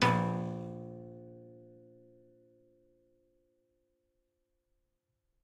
Tiny little piano bits of piano recordings
horndt, live, marcus, noise, piano, sound, sounds